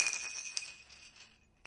Queneau Bombe Peinture19
prise de son fait au couple ORTF de bombe de peinture, bille qui tourne
ORTF, spray, spraycan, ball, paint, aerosol